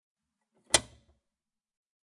Closing a metal box. Nothing more, nothing less